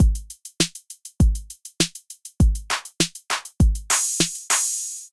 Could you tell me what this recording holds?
APE MONKEYS DANCE
DANCE, MONKEY